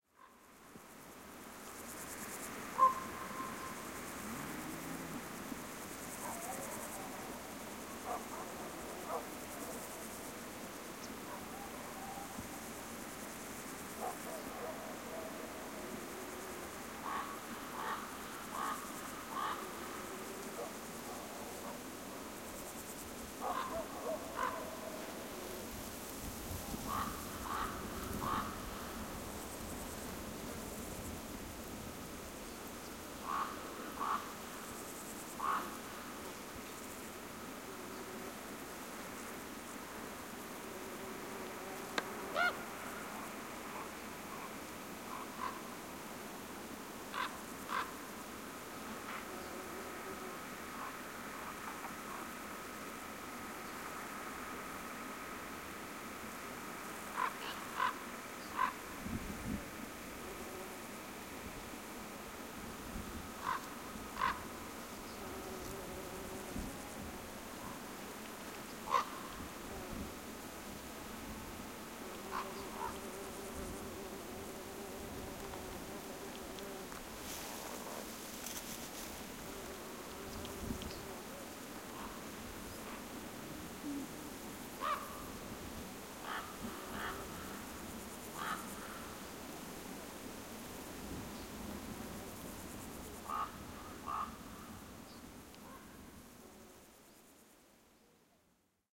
raven calls
Calls of common ravens (rarely to be heard in Europe compared to former times), dogs, cows and buzzing insects near a forest in the agricultural region of Famenne, south-eastern Belgium. Zoom H2.
bird, bird-call, raven